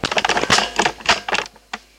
Unintentional noise collected editing audiobooks home-recorded by voluntary readers on tape. digitized at 22khz.
footage, noise